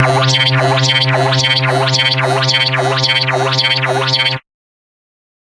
110 BPM, C Notes, Middle C, with a 1/4 wobble, half as Sine, half as Sawtooth descending, with random sounds and filters. Compressed a bit to give ti the full sound. Useful for games or music.
Industrial, electronic, dubstep, wobble, processed, 1-shot, techno, synthesizer, synth, notes, synthetic, porn-core, wah, digital, LFO, bass